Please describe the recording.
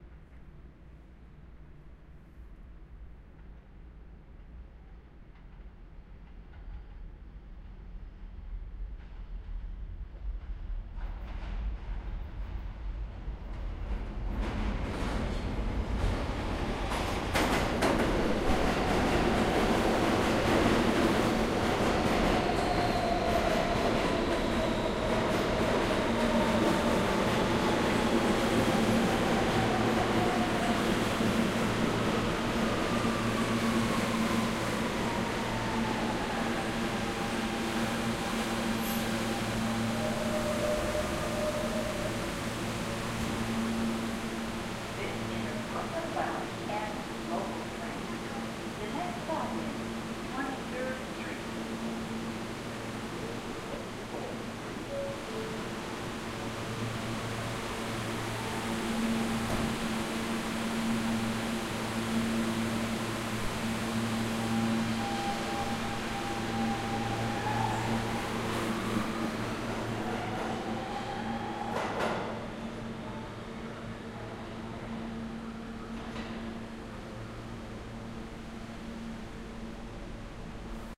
New York City NYC N Train arrives and leaves
I think this was recorded at the 28th Street Station in Manhattan